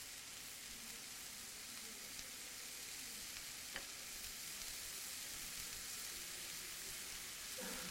fritura, fry, cook, frying, oil,fritando, oleo quente